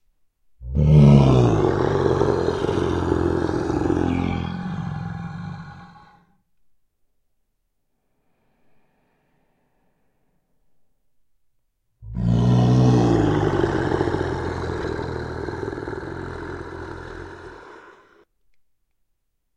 The devil inside of me!
Recorded with Zoom H2. Edited with Audacity.